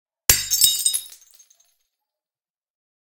The unmistakable sound of a plate breaking on concrete
breaking, concrete, plate